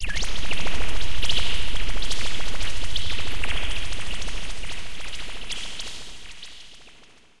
Heavily processed VST synth sounds using various filters, delays, flangers, chorus and reverb.